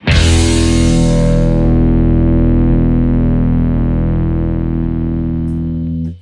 04 E. death metal guitar hit
Guitar power chord + bass + kick + cymbal hit